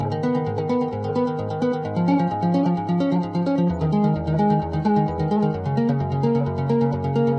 130BPM
Ebm
16 beats
Logic, Synth, Sculpture